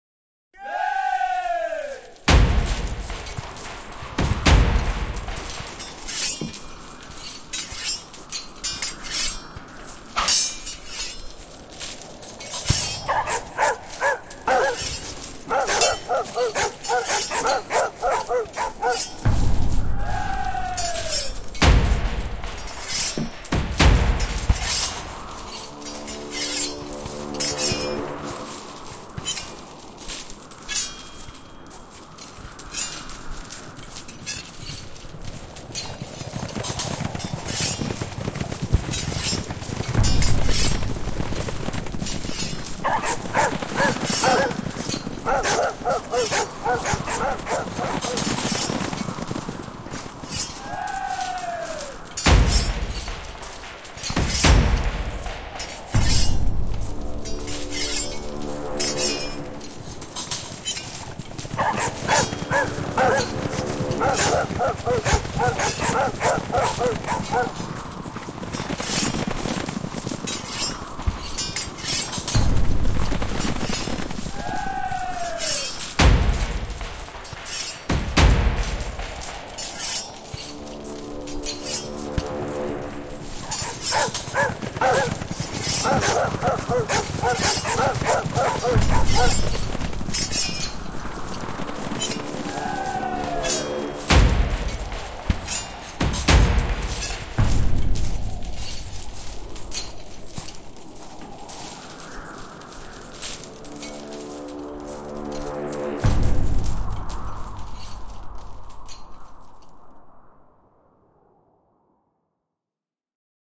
Battle preparations
This is a mix of sounds that I made for a pre-battle preparation of knights. It was used in a theater show for a scene of knights preparing for the battle in a war camp on the eve before a battle.